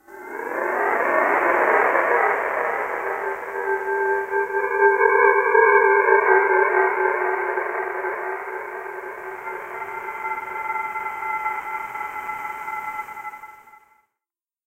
Rewind Spectrum Shift 010203
ethereal, Mammut, synthetic-atmospheres